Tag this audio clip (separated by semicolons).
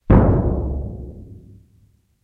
bass; drum; kick